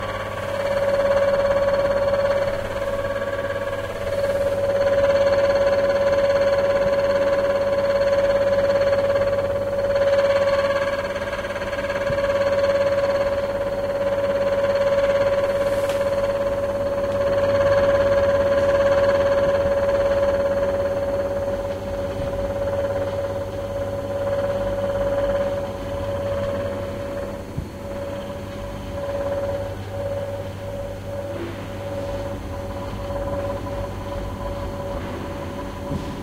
Japan Matsudo Hotel Room Noisy Air Vent
In Matsudo, Japan, the air ventilation of my hotel room made a strange noise for a while.
Recorded with Zoom H2n in MS-Stereo.
Japan, ac, air-conditioner, air-exhaust, airconditioner, exhaust, machine, noise, range-hood, sucking, vent, ventilator